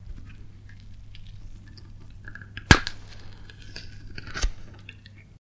note: these samples maybe useful for horror media.
smiles to weebrian for the inspiration, the salads on me (literally)
(if this sound isn't what you're after, try another from the series)
arm, bones, break, effects, flesh, fx, horror, horror-effects, horror-fx, leg, limbs, neck, squelch, torso